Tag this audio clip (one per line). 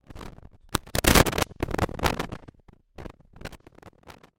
Sound
Crash
Cable
Recording